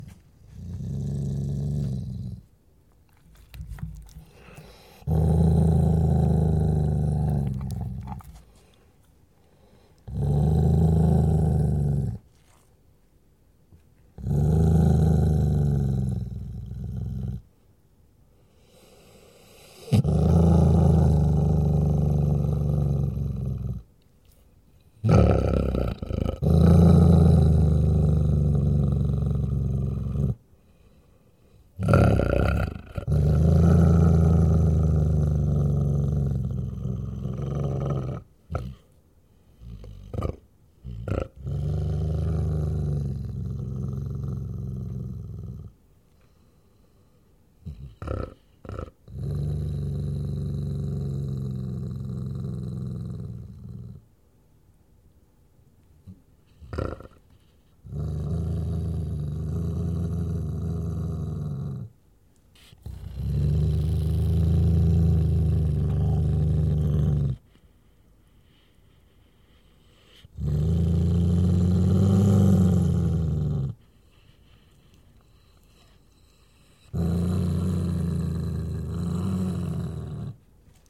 Dog Growl - Beast / Creature
My dog growls when you go anywhere near him while he is eating, i noticed he seems to leave a little bit of food so he could run to it and growl (perform) when i came downstairs later on. I will no doubt use this in a short film and it's here for use by others too.
animal, bark, beast, creature, dog, ghoul, groan, growl, growling, grunt, horror, moan, monster, roar, scary, snarl, undead, zombie